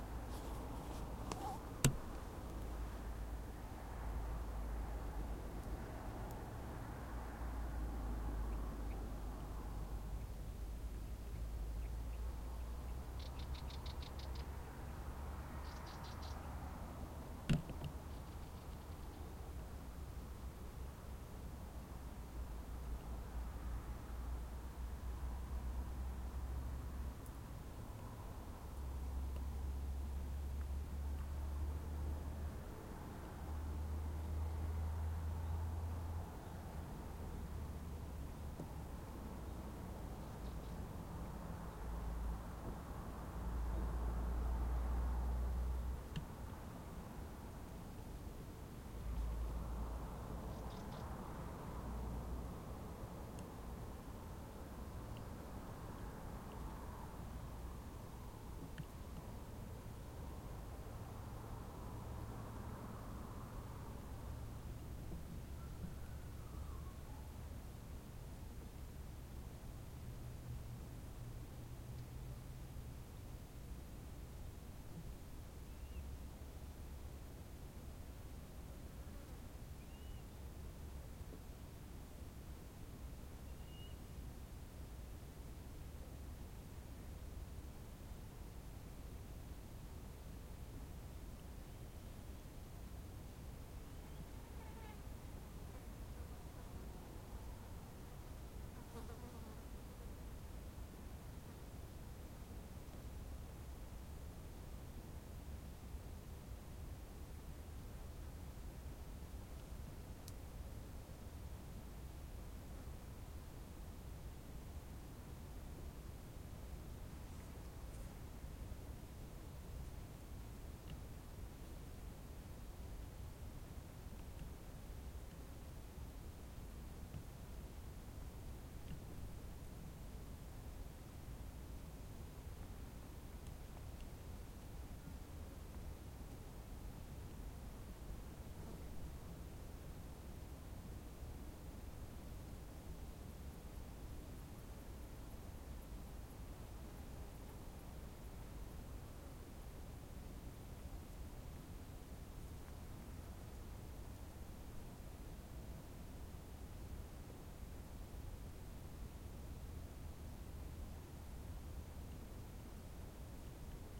Ambience Mountain Outdoor Muntanya Forat del Vent Torrebaro
Mountain Ambience Recording at Pujada Forat del Vent, August 2019. Using a Zoom H-1 Recorder.
MuntanyaForatdelVent, Wind, Crickets